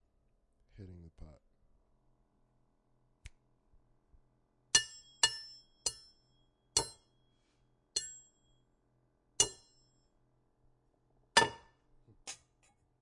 Tapping a pot